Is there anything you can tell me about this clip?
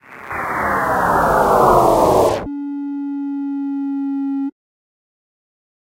Attack Zound-227
Spacecraft landing on Pluto in 2300 A.C. This sound was created using the Waldorf Attack VSTi within Cubase SX.
electronic, soundeffect